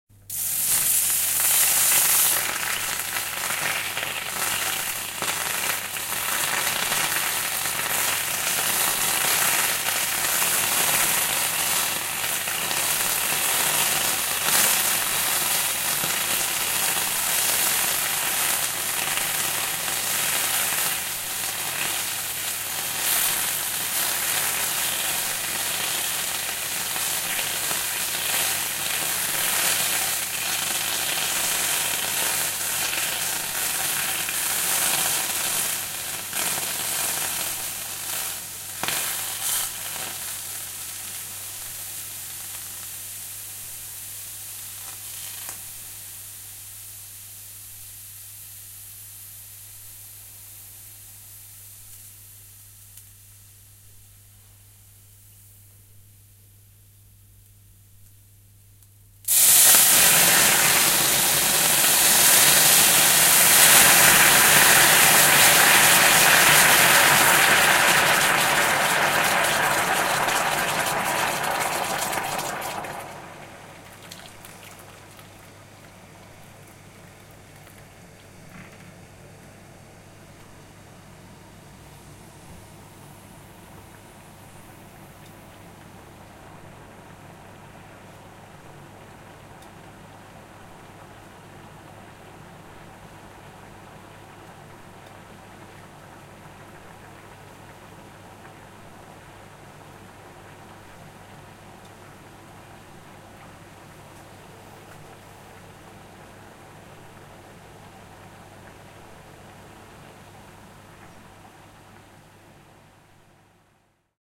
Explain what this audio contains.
Pouring water into a hot saucepan
Pouring a small amount of hot water into an empty hot frying pan. The water boils instantly, then the sound stops as the pan boils dry.
A second far larger quantity of water is poured in, this time until the pan goes off the boil.
boiling, hissing, frying-pan, Kitchen, frying, cooking, bubbling, water, saucepan, hiss